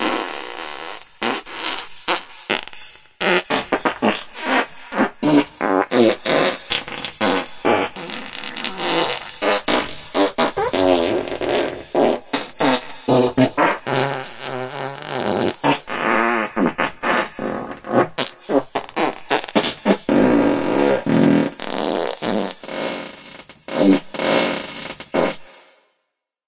Fart Combo Fast Reverb 02
High quality studio Fart sample. From the Ultimate Fart Series. Check out the comination samples.
raspberry, flatulence, passing, ferzan, Geschwindigkeit, hastighed, blowing, razz, Fart, vitesse, brzina, intestinal, velocidad, wind, snelheid, gas